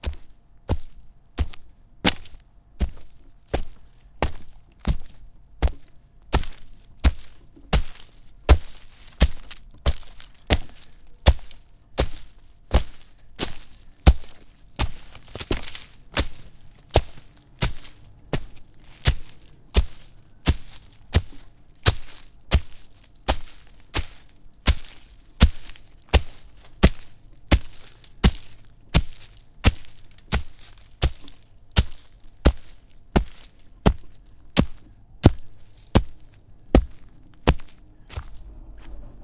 Stomping through the forest. Breaking snapping twigs. rustling leaves
foot, walk, tromp, forest, stomps, steps